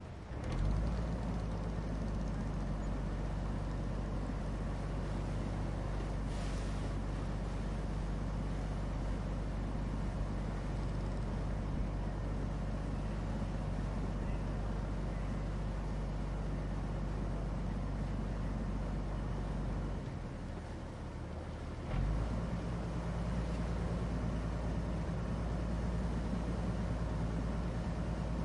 Bangkok Saphan Taksin Boat 2
river, boat, field-recording, engine